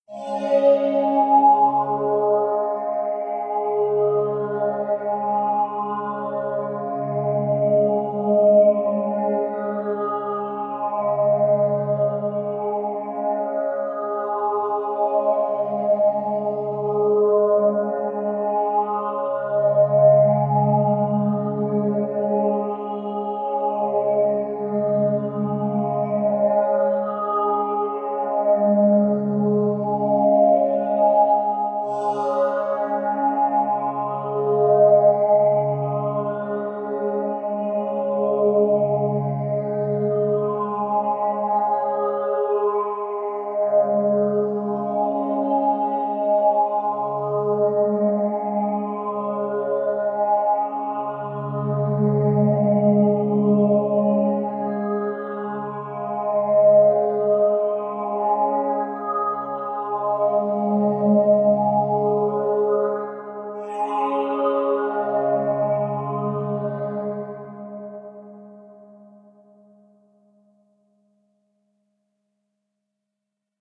angelic alien choir
done on reason on the malstrom graintable synth.
alien, futuristic, sci-fi, atmospheric, angelic, ethereal, soundscape, science-fiction